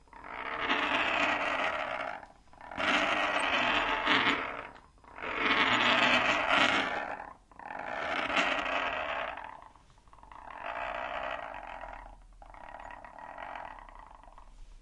sound made by a 1/4-to-1/8 jack adapter rolling on a wooden table /sonido de un adaptador rodando sobre una mesa de madera